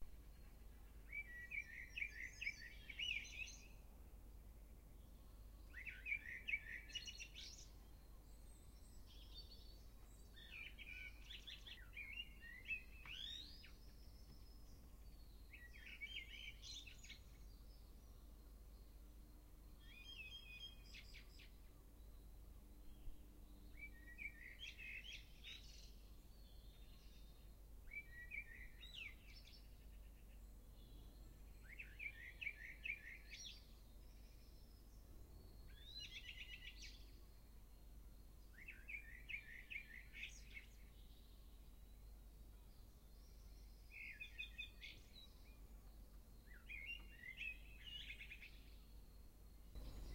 Stavanger nightingale1
Part 1 of 2.
Nightinggale and other birds recorded from inside my room, in May 2000.
Using Apple microphone and minidisc.
Recorded in a green area in a suburb in Stavanger, Norway
birds, field-recording, nature, nightingale, spring